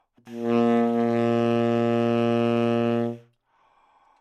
Sax Tenor - A#2 - bad-attack bad-timbre bad-richness
Part of the Good-sounds dataset of monophonic instrumental sounds.
instrument::sax_tenor
note::A#
octave::2
midi note::34
good-sounds-id::5238
Intentionally played as an example of bad-attack bad-timbre bad-richness
Asharp2, good-sounds, multisample, neumann-U87, sax, single-note, tenor